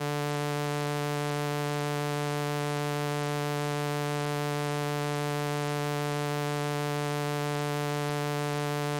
Transistor Organ Violin - D3
Sample of an old combo organ set to its "Violin" setting.
Recorded with a DI-Box and a RME Babyface using Cubase.
Have fun!
70s, analog, analogue, combo-organ, electric-organ, electronic-organ, raw, sample, string-emulation, strings, transistor-organ, vibrato, vintage